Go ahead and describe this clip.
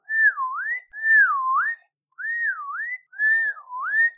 A whistle sound.
sound, whistling